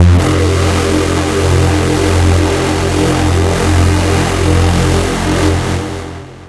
SemiQ leads 8.
This sound belongs to a mini pack sounds could be used for rave or nuerofunk genres
deep, delay, effect, experimental, fx, pad, sci-fi, sfx, sound, sound-design, sound-effect, soundeffect, soundscape